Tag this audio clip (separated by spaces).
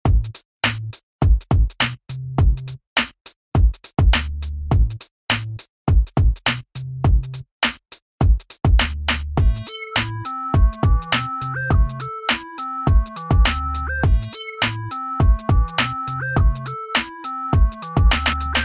beat hip-hop